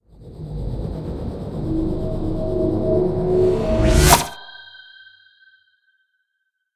Dark Teleport
Dark energy pulses as a group of baddies disappear.
Sources:
weapWeappear
tongue-mouth-pop-7
magic, spell, teleport, portal, DnD